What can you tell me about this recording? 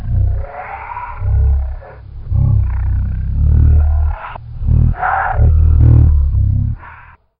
First track (7 seconds)
I recorded a voice men and reverse it (effect), i changed the speed rate (-76) and increase the volume. Always in « Effect », i apply « Fade off » 1 sec at the end.
Typologie (Cf. Pierre Schaeffer) : N (Continu tonique) + X (Continu complexe)
Morphologie (Cf. Pierre Schaeffer) :
1- Masse:
- Son "seul complexe"
2- Timbre harmonique: terne
3- Grain: rugueux
4- Allure: plusieurs vibrato
5- Dynamique : pas d’attaque
6- Profil mélodique: glissante, sans séparation nette
7- Profil de masse
Site : 1 strat de son qui descend dans le grave.